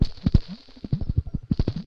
Burried Alive Loop
Everyones nightmare brought to you in electro form.
bend, bending, bent, circuit, circuitry, glitch, idm, noise, sleep-drone, squeaky, strange, tweak